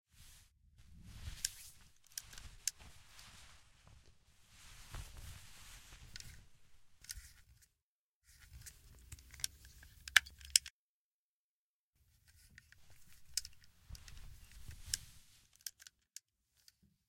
Moving a gun around in a hand.
foley, hand, movement